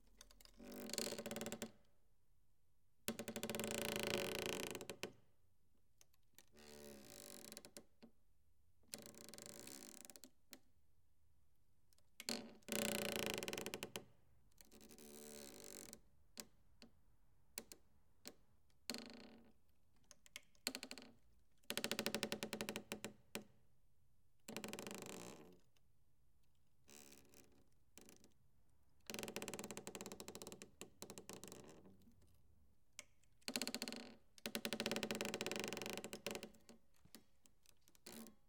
Metal rod pierced through plywood being slowly moved around. I was specifically going for that slow, creaking wood sound here.
Rode M3 > Marantz PMD661.